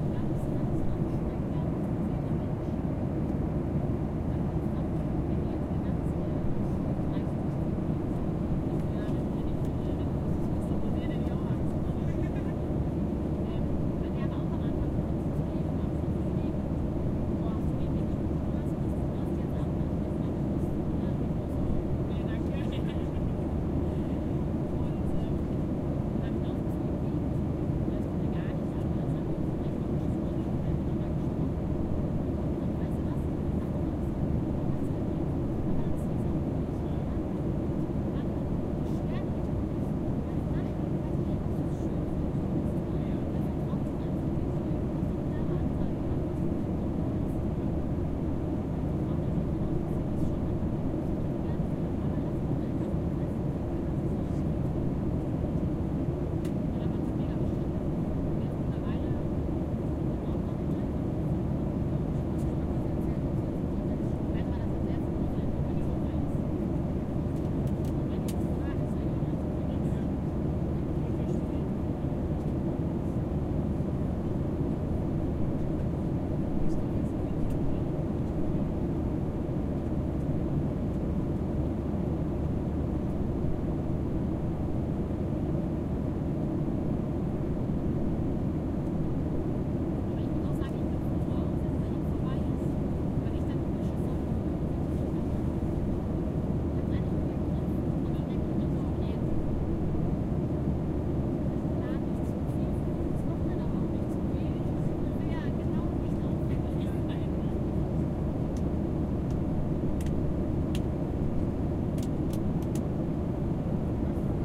Ambience INT airplane flying german chatter 2
Ambience, INT, airplane, chatter, flying, german